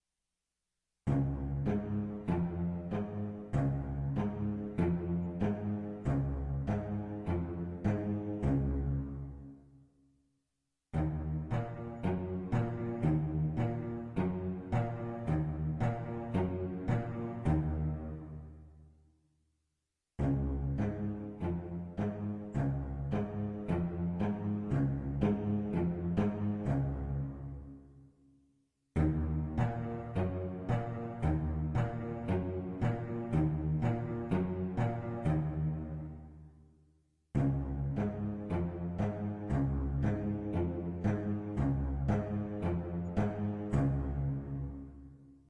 Some clips created for transition in a play. Originally for Peter Pan but maybe used for other plays.